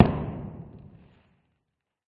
Knall10 1zu4
a systematic series: I´ve recorded the pop of a special packaging material several times with different mic settings. Then I decreased the speed of the recordings to 1/2, 1/4, 1/8 and 1/16 reaching astonishing blasting effects. An additional surprising result was the sound of the crumpling of the material which sound like a collapsing brickwall in the slower modes and the natural reverb changes from small room to big hall